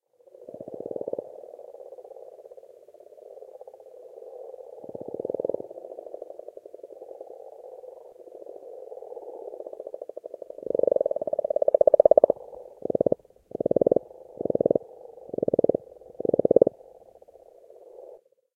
Frog Close
A stereo field-recording of frogs (Rana temporaria) croaking at spring in a garden pond, one frog is very close mic'd . Recorded from underwater by inserting a lavalier mic in a weighted condom and immersing, as it was much too windy to record conventionally.
croaking, close-mic, frogs, croak, pond, field-recording, rana-temporaria, stereo